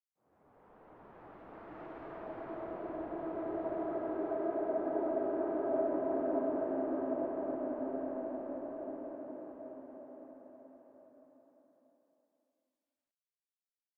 White noise processed with TL Space.
Sound effect for wind, clouds, spooky ghost and paranormal activities.